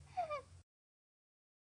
pero que fue golpeado, o asesinado
dolor, gemido, perro